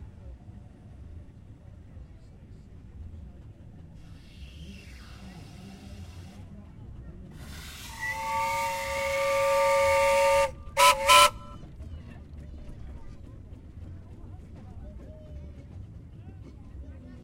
Traction engine whistling as it passes by in show.